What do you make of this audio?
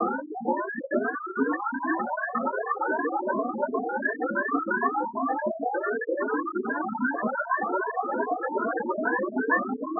Created with coagula from original and manipulated bmp files. The sound you make when calling Laika from orbit for dinner.